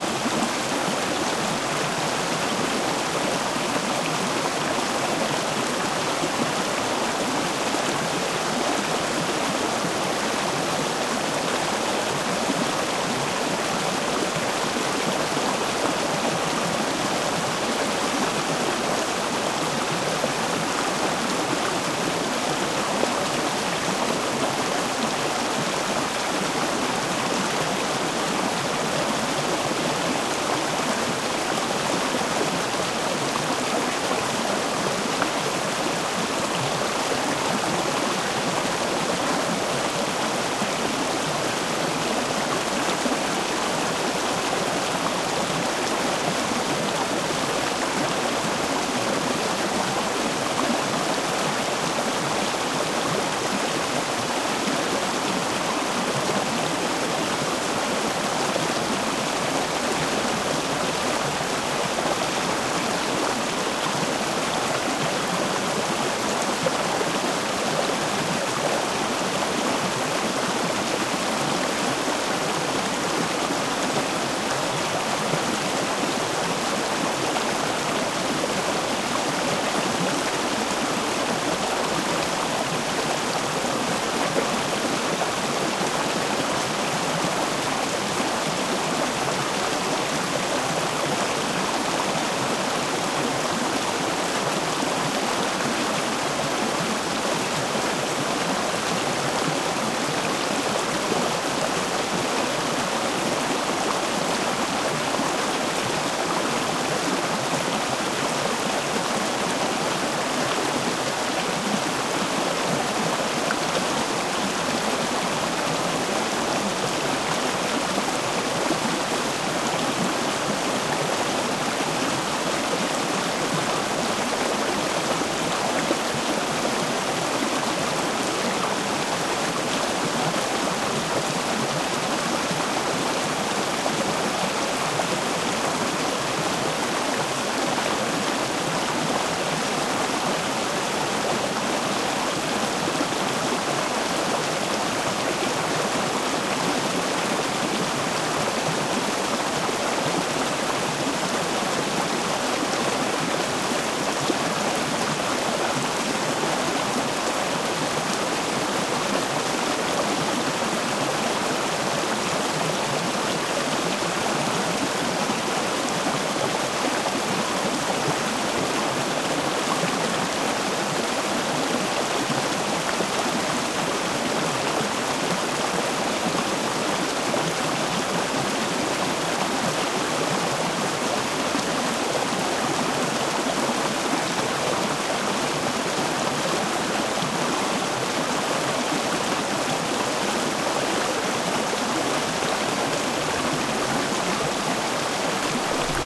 strong river gurgling
Stream running noisily over stones, gurgling in pools. Recorded on Zoom H2 in the south of sweden.
gurgling, waterfall, babbling, flowing, brook, creek, stream, river, running, water, rivulet